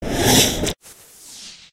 Power up sound for space ship waste systems. Created for a game built in the IDGA 48 hour game making competition. The effect is based around a reversed sample of a car door strut recorded using a pair of Behringer C2's and a Rode NT2g into a PMD660.
computer, eject, game, power-up, powerup, waste